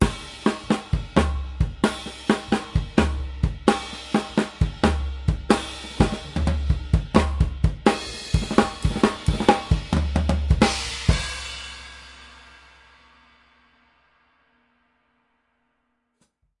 Acoustic drumloop recorded at 130bpm with the h4n handy recorder as overhead and a homemade kick mic.
acoustic, drumloop, drums, h4n, loop